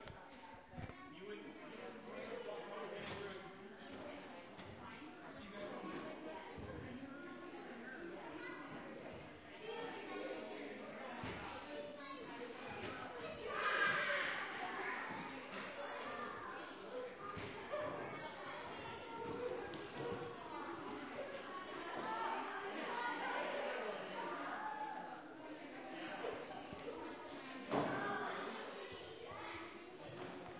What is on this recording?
Jr High Hallway Front
Commons area of a Junior High School during lunch.
people, school, crowd, talking, kids